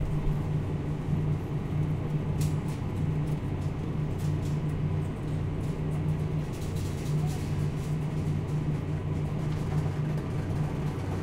Woodman's grocery store ambiance 2
A short but sweet sampling of vents, grocery carts and miscellaneous sounds.
A different grocery store than the one heard in "field recording of a Pick 'n Save grocery store" (12/04/2016).
Recorded 10/03/2015.
air, ambience, ambient, carts, environment, field-recording, grocery, mechanical, metal, produce, repetitive, urban, vents